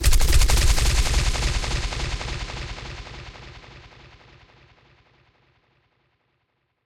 a abstract explosion sound, with a repeating dissipating wave